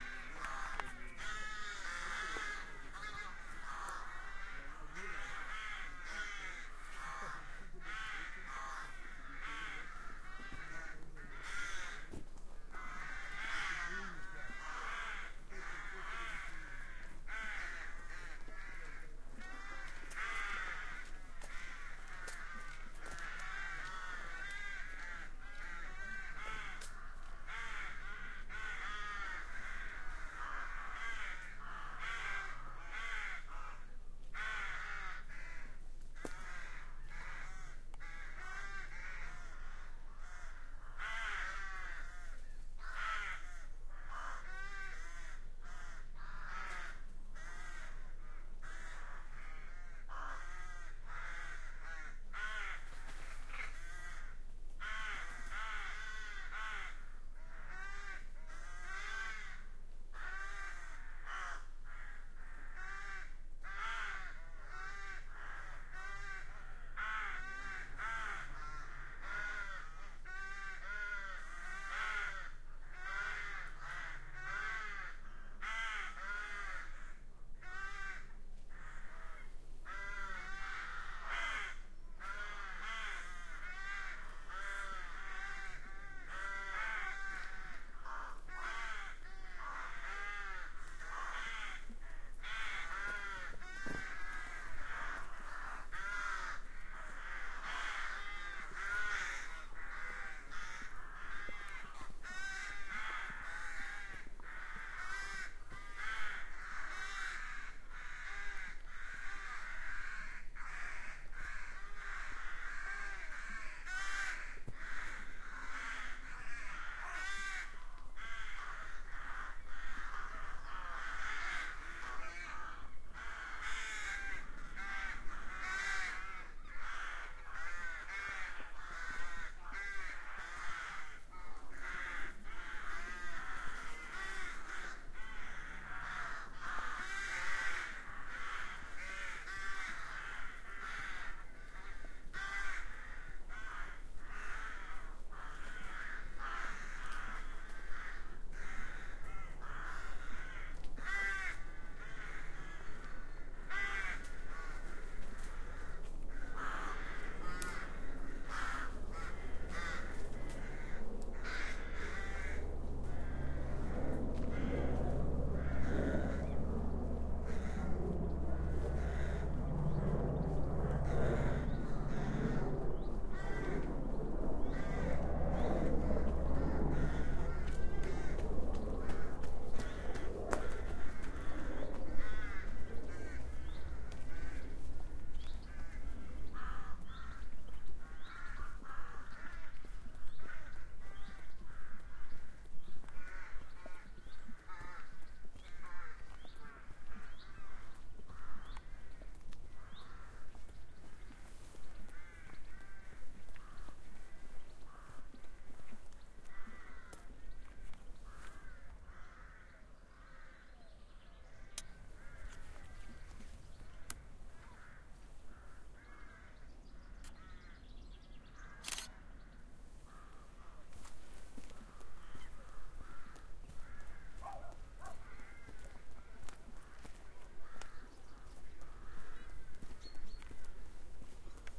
Chorus of crows
A recording of a flock of crows in the trees above.